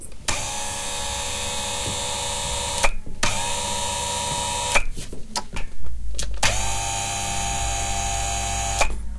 motor adjustable bed
positioning bed in the massage studio
electric, house, machine, motor, noises